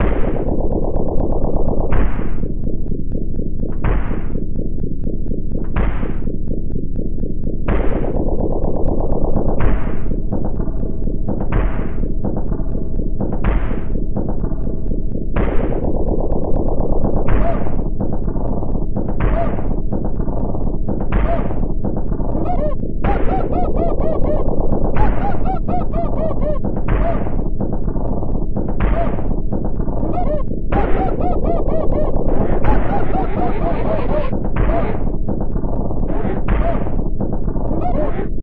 GOD IS FUNNY
A simple tune which is different but catchy.
- recorded and developed August 2016.
experimental, loop, effect, gaming, dub-step, electronic, rave, ambient, drum, waawaa, synth, dance, bounce, trance, bass, beat, loopmusic, hypo, Bling-Thing, game-tune, electro, game, blippy, intro, drum-bass, techno, dub, club, glitch-hop